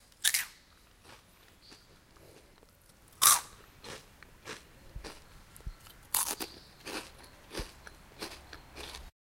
chip bite 2
biting a chip
bite, chew, chips, crunch, crunching, eat, eating, food, OWI